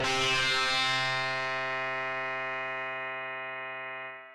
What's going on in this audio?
hard sitar hit